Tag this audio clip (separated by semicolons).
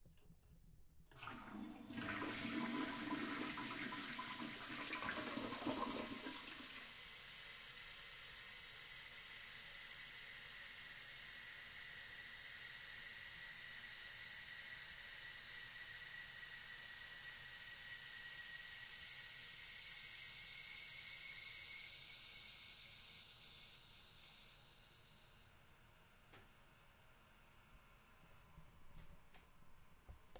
bathroom; flush; flushing; restroom; toilet; washroom